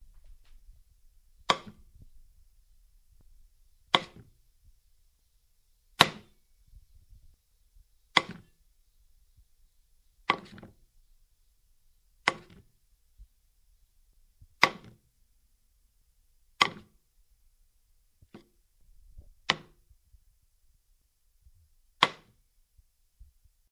Rive recording of sitting a small pot onto a hard surface
field-recording, pot, kitchen, pan